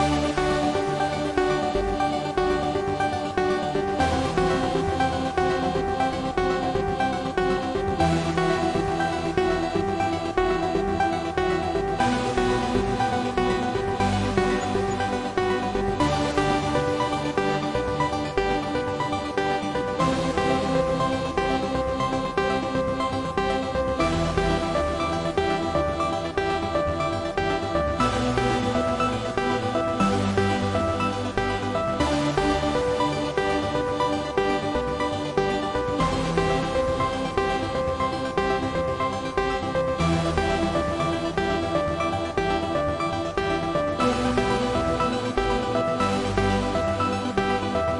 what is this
electronic trance leads - loop mode.
ambiance, club, dance, digital, electro, electronic, hardstyle, house, leads, loop, loopmusic, melody, music, sound, soundscape, synth, techno, trance